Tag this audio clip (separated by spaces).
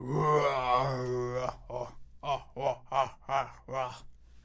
evil laugh laughter